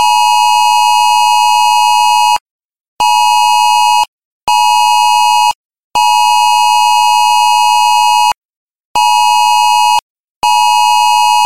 iPhone WEA (Emergency Alert) Attention Sound
****NOT FOR BROADCAST - DO NOT BROADCAST THIS SOUND THROUGH AIR****
It is EXTREMELY ILLEGAL to transmit audio that clearly sounds like the Emergency Alert System's noise (different from WEA but very similar) through the airwaves in USA (such as on walkie-talkies and FM transmitters), and the FCC can issue fines for thousands of dollars for breaking this federal law. The sound itself is not illegal, broadcasting it is.
This is the noise that Apple iPhones immediately play when a WEA (Wireless Emergency Alert) message (Tornado Warning, AMBER Alert, Evacuation, etc) is received. It is used to get the attention of the user to check the iPhone and read the WEA message.
apple, ios, tone